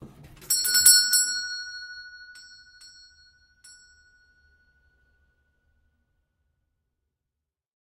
Doorbell Pull with pull Store Bell 02
Old fashioned doorbell pulled with lever, recorded in old house from 1890
Store, Pull, Doorbell